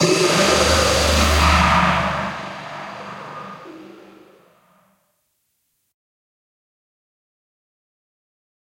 Roar from the depths of the unknown.
dark, sound-design, film, cinematic